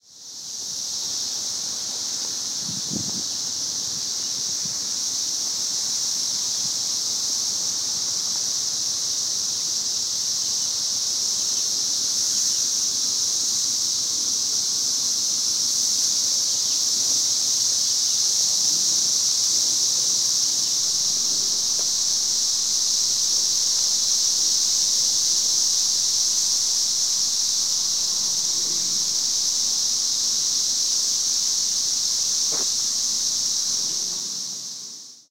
13-year cicadas in Tennessee
Not that great of a recording, but this is the loud sound of hundreds of cicadas in a tree outside my home in Nashville, Tennessee, in 2011. These are 13-year cicadas which emerge in large numbers every 13 years in summertime. Recorded with an iPhone.
cicada, iPhone, Tennessee